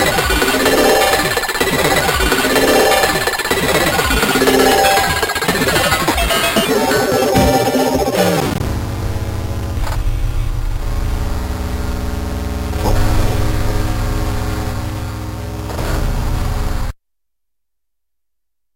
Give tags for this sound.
dark dee-m drastic glitch harsh idm m noise pressy processed soundscape virtual

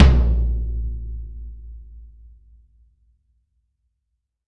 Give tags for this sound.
sample tama percussion drum hit tom drums kit

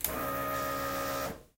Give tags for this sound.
bmw buzz fuel motorcycle pump servo

